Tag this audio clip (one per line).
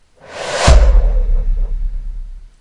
fast
impact
move
transition
whoosh
woosh